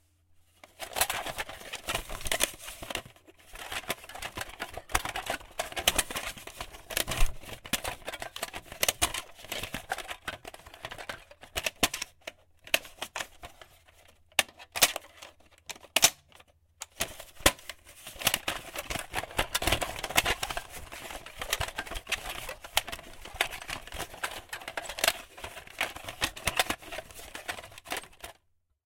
Fiddling around with a VHS Tape, noises of handling it.
Film, Noise, VHS